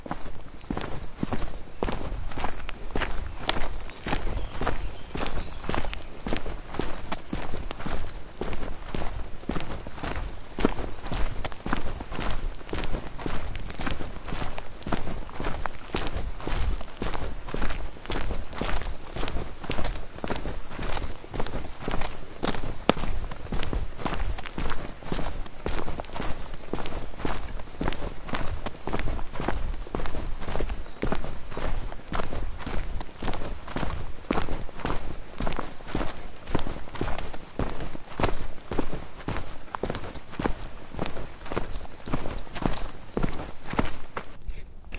This is me hiking in the forest :-)